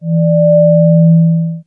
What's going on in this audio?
slobber bob E2
Multisamples created with Adsynth additive synthesis. Lots of harmonics. File name indicates frequency. E2